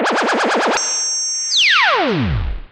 Laser02rev
Retro laser sound. Made on an Alesis Micron.
synthesizer, alesis, micron, laser, sci-fi, zap